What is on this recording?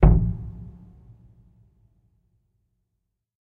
Piano lid gently taps as it is opened and rests against the upper front board.
Organic reverberations can also faintly be heard from the detuned strings.
Possibly could be used for something interesting, it has a wooden bass drum sound.
100+ year old upright piano, microphone placed directly inside. (Sample 1 of 4)